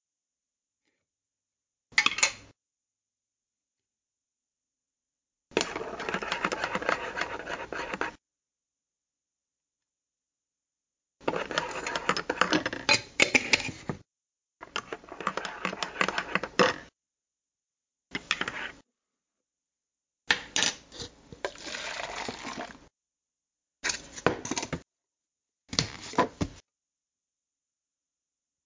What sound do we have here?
Metal Spoon Stirring Sugar into cup of coffee
Stirring Sugar
Pouring Coffee into Take Away (To Go) Cup
Snapping Lid on Coffee Cup
Suitable for barista type background sounds